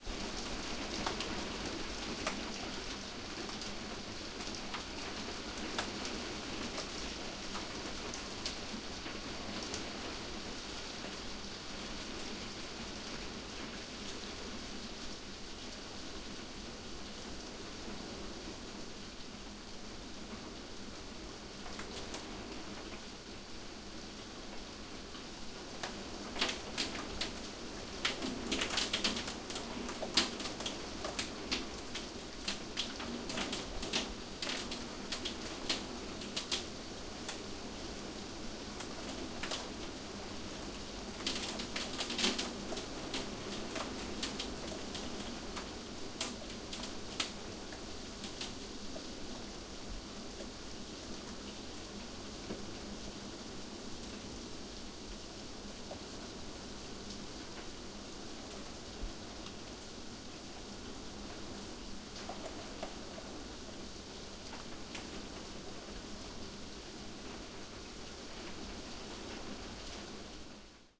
Heavy Rain Dripping Internal UK Cambridge
Recording of a rain storm inside a house. There were some dripping from the roof.
Equipment used: Zoom, H4 Recorder, internal mics
Location: Cambridge, UK
Date: 16/07/1